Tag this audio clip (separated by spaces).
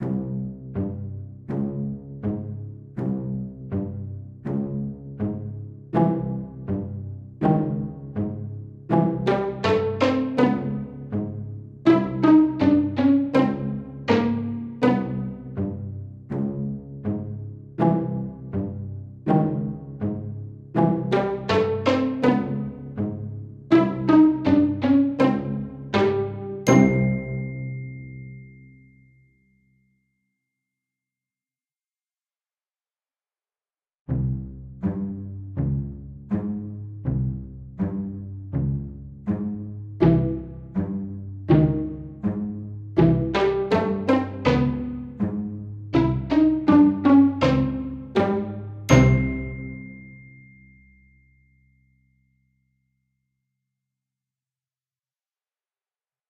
music short glockenspiel strings sneaky cartoon plucked Pizzicato song mischief mischievous